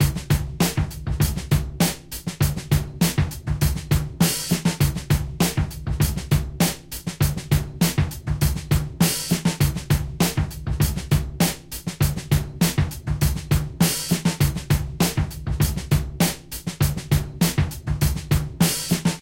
Real drums loop 100 BPM (i recorded it)
drum, drums